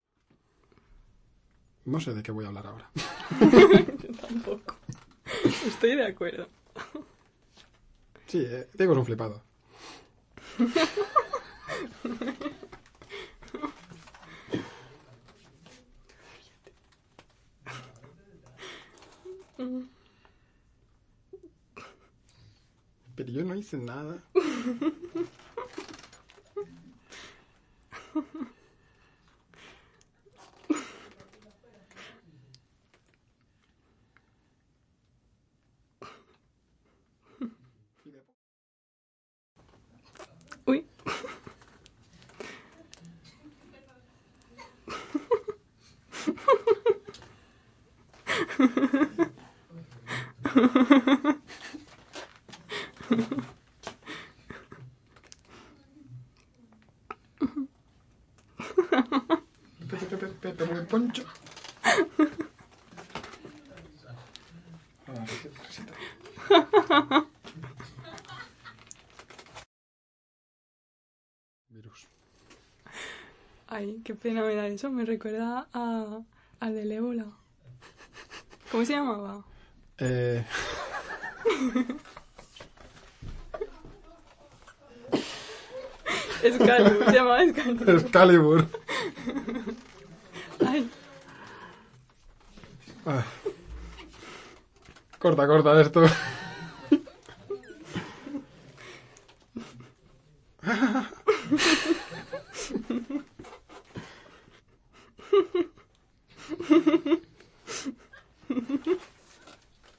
Laughter and giggle of a young woman (conversation)
Conversation and laughter of a young woman. Studio recording